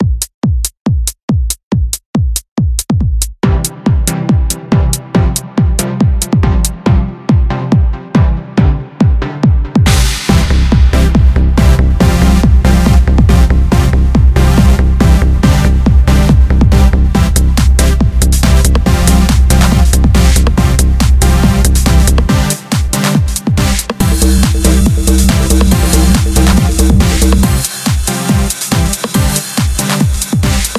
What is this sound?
Techno-Freak
Cool cinema bass sound, music!!
hd, tech, cool, freak